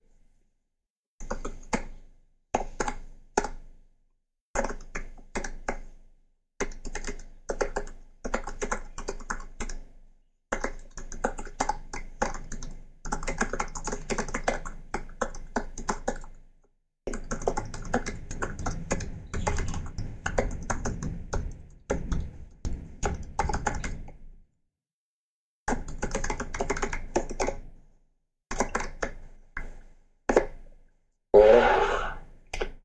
Just recorded with my M-Audio Microtrack a friend typing a mail with its MacBook Pro via Skype.
laptop, send, sent, apple, typing, mail, keyboard